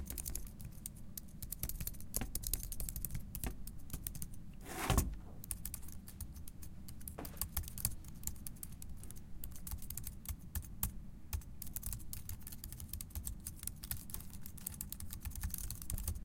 Typing Fast
Fast, macbook, typing